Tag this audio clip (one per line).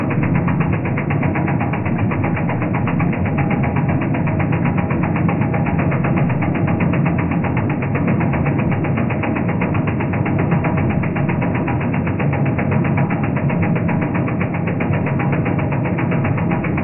rolling
sci-fi
machinery
industrial
gear
science-fiction
machine
mechanical
rotation
roll
SF